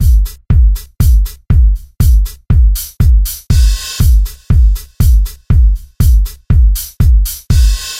Electronic rock - Red-ox P4 Rhythm drum 01.Mixed, compressed & limited.